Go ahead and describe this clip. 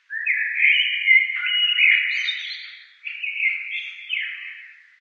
These are mostly blackbirds, recorded in the backyard of my house. EQed, Denoised and Amplified.